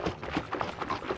do the Harlem shake? why not the Paint can shake?
Needed to replicate someone shaking a jerry can to check if there is fuel inside. because someone didn't think to add water or something in it while they were on set filming the bloody thing. So the closest thing I could find to recreate the sound was an old paint can down stairs.